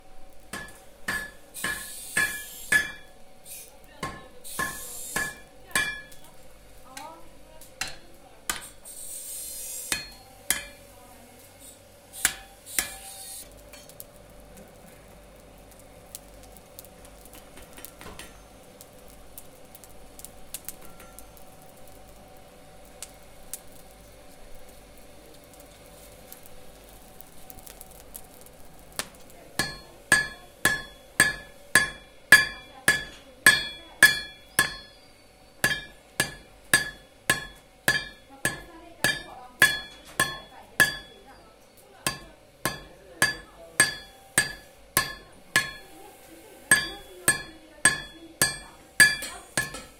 By the blacksmith-001
Blacksmith hammering on a piece of metal forming a shoehorn
workshop, hammering, Blacksmith, building, hammer, art